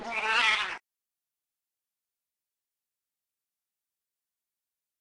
Siamese cat meow 8
animals, cat, meow